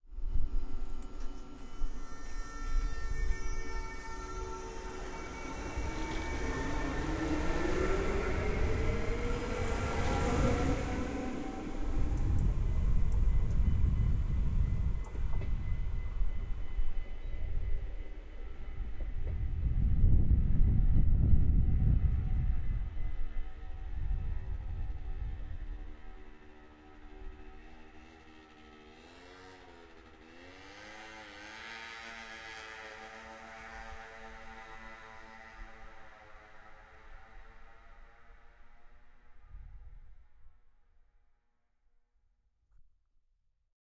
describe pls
Nightly Dutch Traffic - Train and Moped
A night on the streets in Dutch town Hilversum. Recorded in stereo with Rode NT4 + Zoom H4.